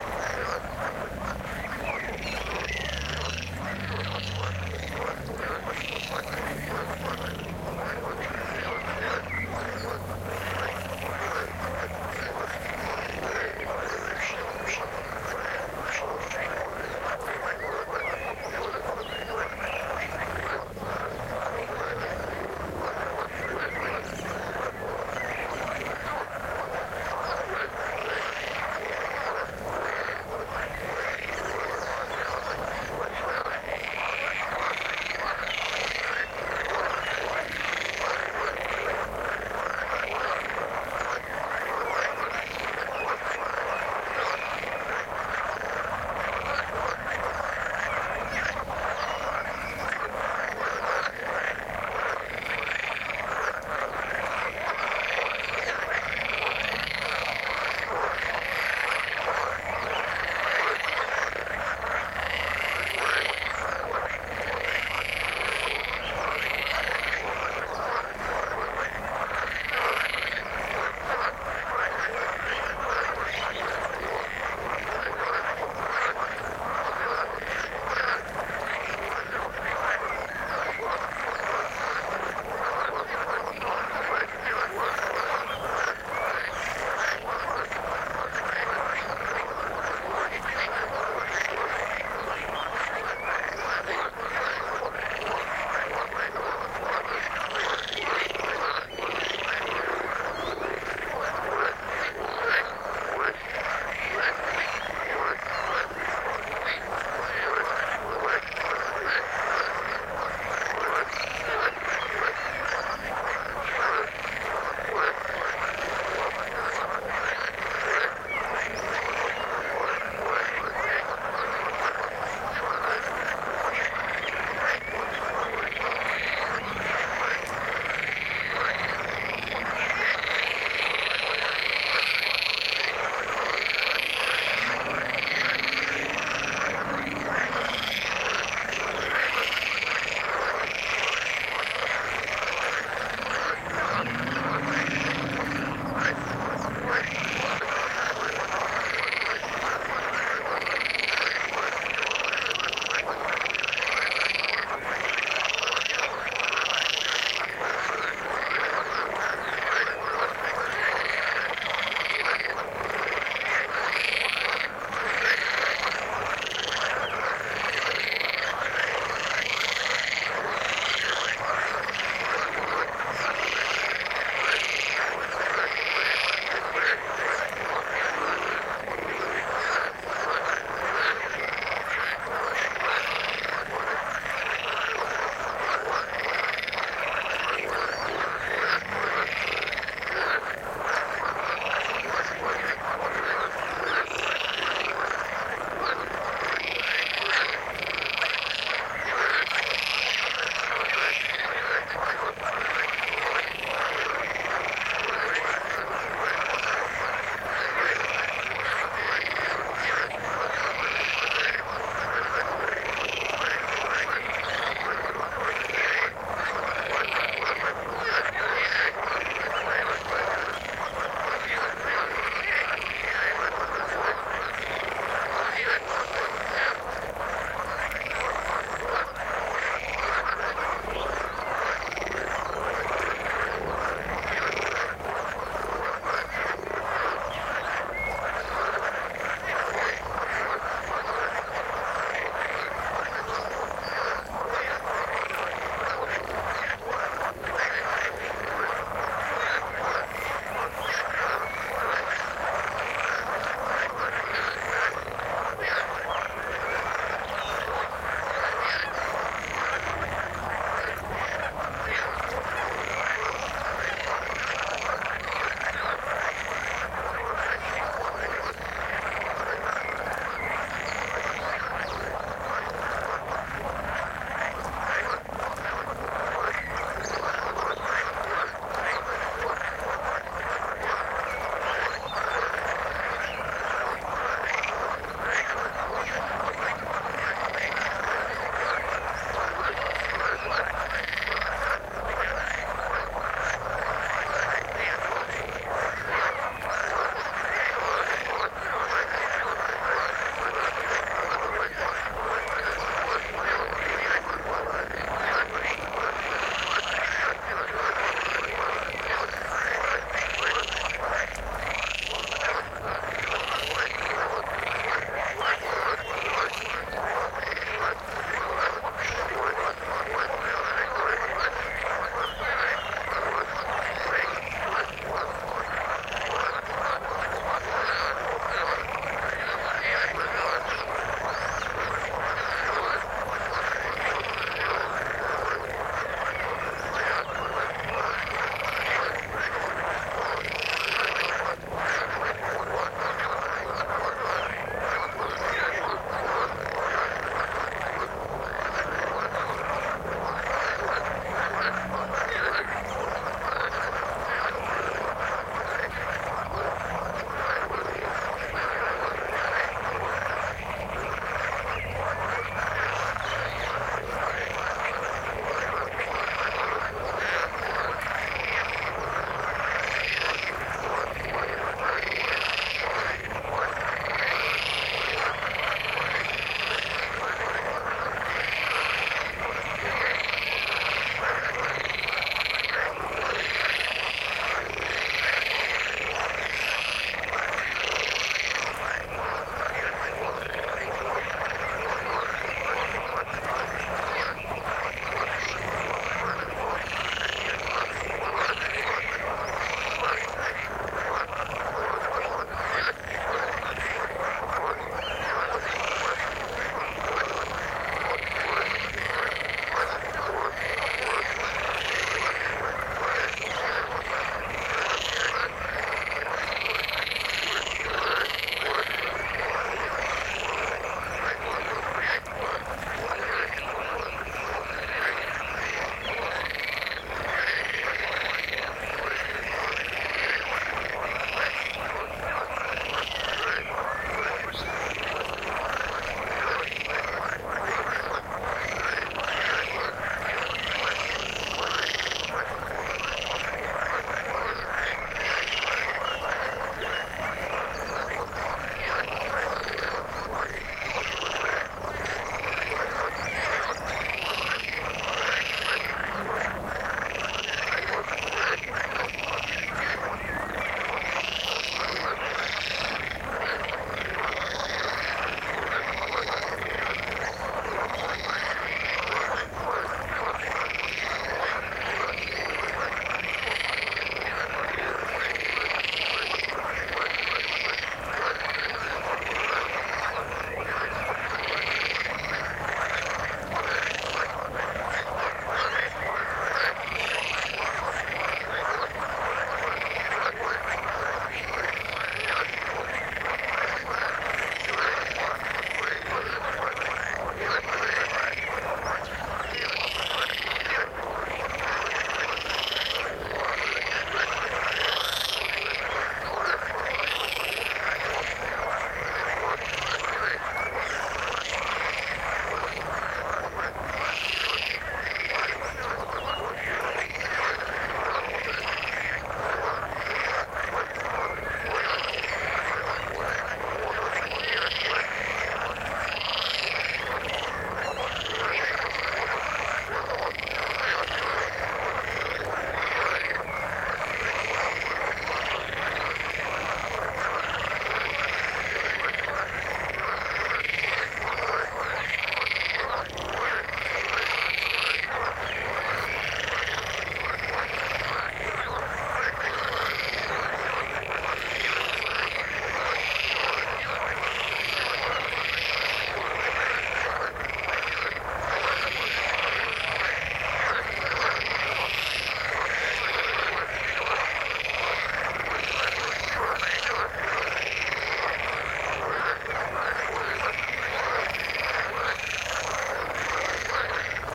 A small pond in the marshes, very lively with frogs singing their songs in spring. Recorded during sunset with Zoom H2n, no editing. You will also hear birds, waterbirds, and in the far background cars and even gunshots.
The money will help to maintain the website:
ambiance, amphibia, frog, frogs, lake, nature, spring